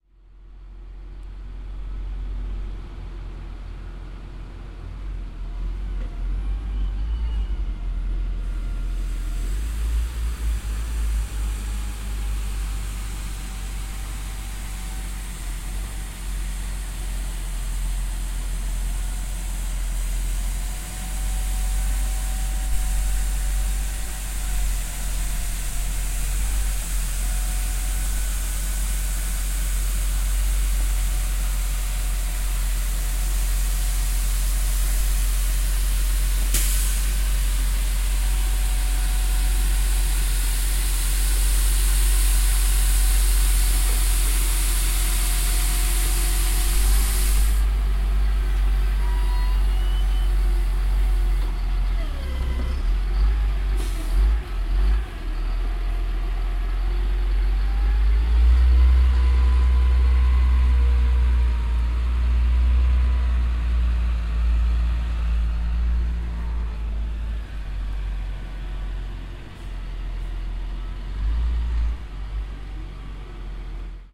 Broom Bear Street Cleaner Brushes Aproach Idle Reverse Stop
Broom Bear Street Cleaner dropping brushed, approaching, Idling infront of the mic, reversing, pulling to a stop.
Street, Bear, working, Cleaner, Broom, work, Brushes